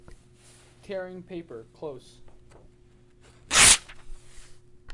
Close-up recording of a person tearing paper